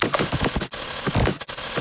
As all files in this sound pack it is made digitally, so the source material was not a recorded real sound but synthesized sequence tweaked with effects like bitcrushing, pitch shifting, reverb and a lot more. You can easily loop/ duplicate them in a row in your preferred audio-editor or DAW if you think they are too short for your use.